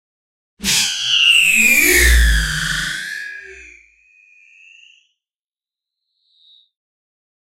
STING, HI TO LOW. Outer world sound effect produced using the excellent 'KtGranulator' vst effect by Koen of smartelectronix.